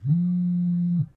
Cell Phone Vibrate
vibrate, telephone, phone, sound, effect, vibration, cellular, cell